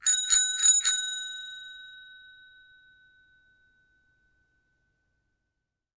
Bike bell 03
Bicycle bell recorded with an Oktava MK 012-01
bell, bicycle, bike, ring